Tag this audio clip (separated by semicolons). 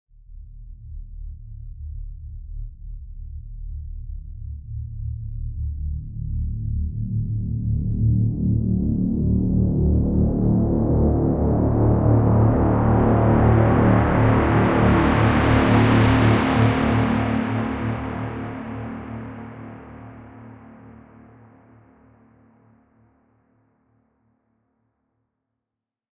Synthesizer; Scifi; Synth; Trailer; Drone; Sweep; Filter; Electronic